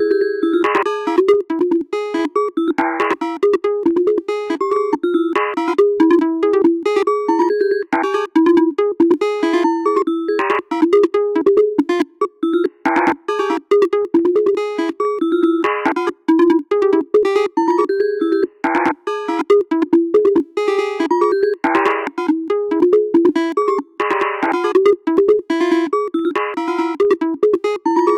membrane Foundtain 2
acoustic, vst, analog